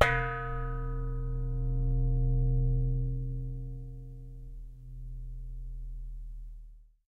Always listening out for interesting sounds, I had to have a go at tapping my new barbicue lid - a big metal dome with a nice resonent ring. Recorded on my ipod touch 3G with blue mikey stereo microphone and FiRe 2 app.